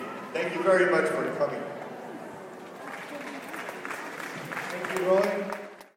A guy saying "Thank you very much for coming." followed by soft applause and a guy coming back on stage saying "Thank you, Roy." Recorded at an event with an iPhone using Voice Memos.
crowd, guy, speech